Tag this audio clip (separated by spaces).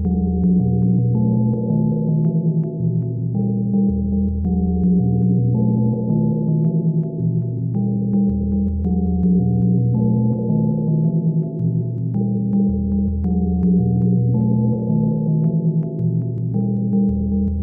atmosphere creepy dark fear horror modular scary sinister spooky synth synthesizer terror